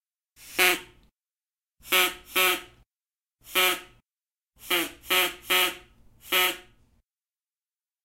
Here is the sound of a plastic duck caller
plastic, funny, hunter, duck, lol, squeaking, animal, quack, bird, toy